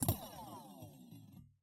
BS Bend 33
metallic effects using a bench vise fixed sawblade and some tools to hit, bend, manipulate.
Stretch, Bend, Curve, Flex, Sawblade, Metal, Effect, Bow, Inflect, Curved, Saw, Squeaking, Sound